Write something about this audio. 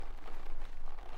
Leather Strap Twisting Distant 01
This is a recording of a piece of leather strapped to the saddle, tightening.
tighten, Twist